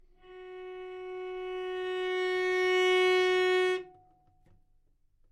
Cello - F#4 - bad-dynamics
Part of the Good-sounds dataset of monophonic instrumental sounds.
instrument::cello
note::F#
octave::4
midi note::54
good-sounds-id::4397
Intentionally played as an example of bad-dynamics